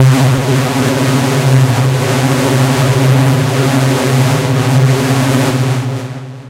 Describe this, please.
SemiQ leads 9.
This sound belongs to a mini pack sounds could be used for rave or nuerofunk genres